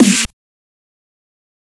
Dubstep Snare 6
A snare I made in Fl Studio by layering multiple different snare and tom sounds together and EQ'ing them slightly.
punchy, Dubstep, snare, pitched, drum-and-bass, dnb, processed, heavy, hard, adriak, hip, hop, FL-Studio, glitch, skrillex